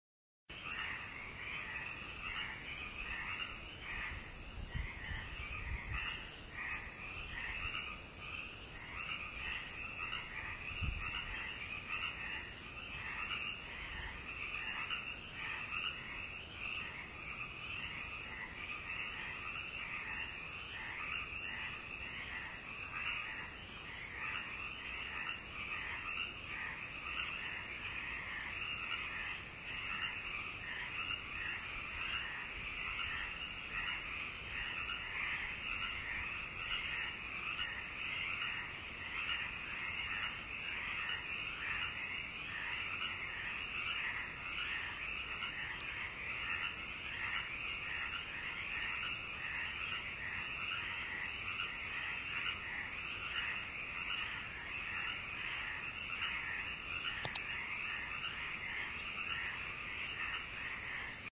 me froggies
Tree frogs returning to the trees surrounding the lakes of Marina Bay, Richmond, California.
chirps, field-recording, frogs, night-sounds, tree-frogs